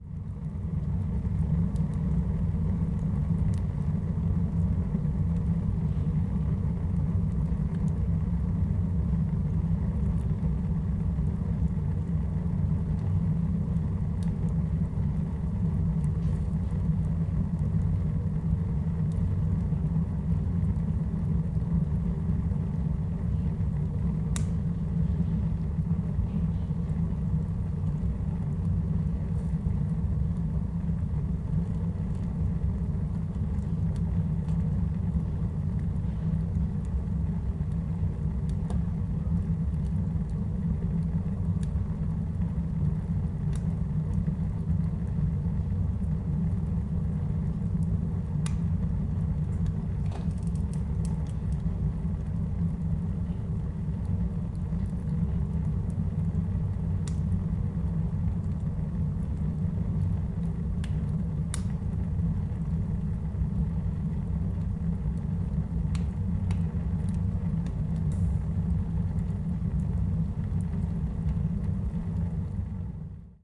20.12.11: about 7 p.m. sound of the fire in stove. cracking and rustleing. karkonoska street in Sobieszow (south-west Poland). recorder: zoom h4n. fade in/out.
ambient-noise, crack, fieldrecording, fire, stove, swoosh